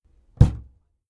Heavy door knock
door, knock